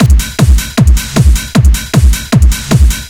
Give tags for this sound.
dance hard loop drums percussion

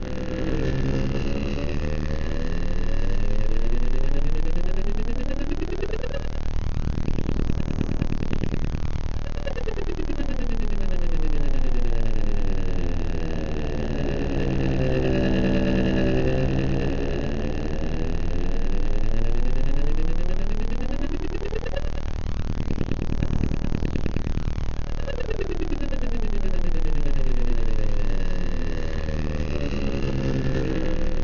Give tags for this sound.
abstract alien electronic glitch strange weird

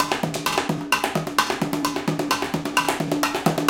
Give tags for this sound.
drums acoustic metal industrial bottle loop breakbeat hard percussion music food percs hoover break groovy improvised loops 130-bpm perc fast drum-loop funky cleaner garbage container dance drum beats beat ambient

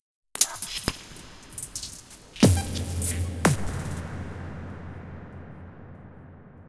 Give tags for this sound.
drums dub mangled noise reverb-experiments

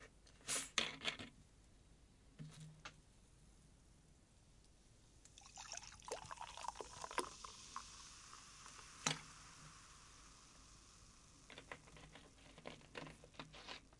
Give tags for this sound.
mic-audio recording-omni sound studio

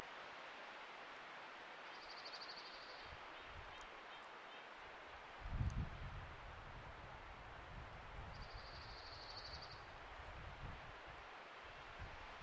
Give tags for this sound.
bird chirp environmental-sounds-research field-recording forest river trill tweet water wilderness wind

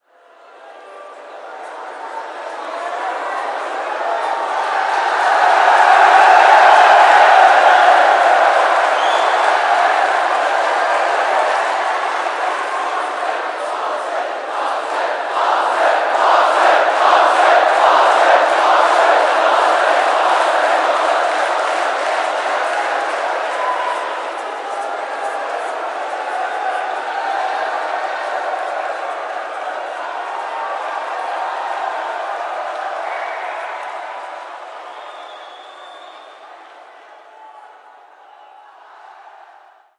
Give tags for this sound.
audience big cheering concert crowd event hall loud people

